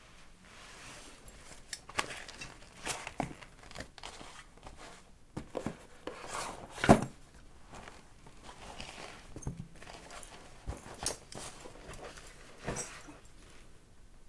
Book Put into Old Backpack
Needed the exceptionally specific sound of putting an old book into an old hiking backpack. This is that sound.
The Recording starts with me the backpack off, before placing the book into the backpack and throwing the backpack back over my shoulder.
Backpack Description:
An old-ish (Likely 60's) Hikers backpack with a metal "seat" that folds out. Made out of wool, leather, metal and the inside is plastic (A thick plastic bag that keeps everything dry). All Straps are made of leather and metal that makes a very distinct jangly sound.
Book Description:
This is an 1841 (Handled with great care) edition of "Hegel's Logik" Hardcover with a Leather spine.
backpack, Rustle